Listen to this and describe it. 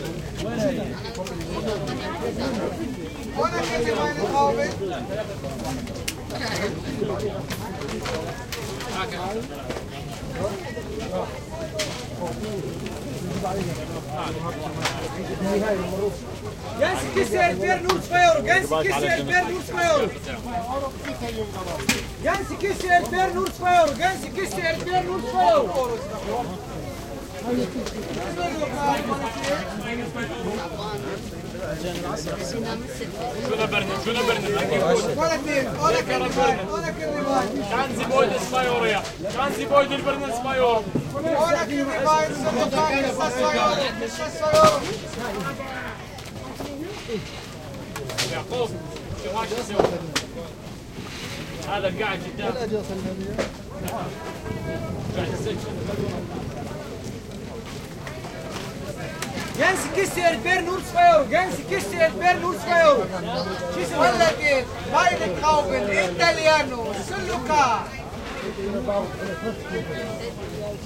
weekly market [2]
The weekly market in Leipzig at the Sportforum. You can buy a lot of very cheap fruits and vegetables. A nice mixture of different cultures and people. You can hear mostly german and arabic speaking people, screaming, singing, talking ...
The loudest barker sell the most? Hm.
street, arabic, pitchman, melee, people, jam, barker, market, crowd, talking, screaming